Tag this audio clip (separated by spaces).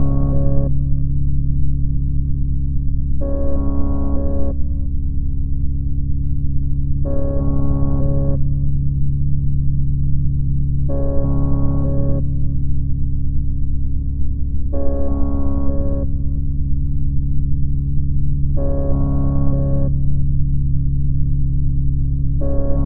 oscillator sound